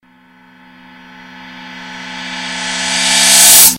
A cinamatic horror sound. Basically a reversed and sped-up gong.